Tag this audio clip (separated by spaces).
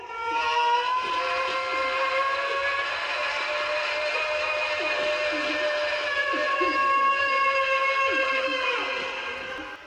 nightmare man falling screaming human